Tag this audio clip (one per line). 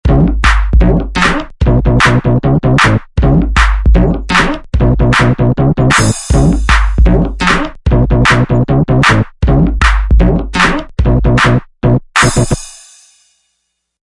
weird
guitar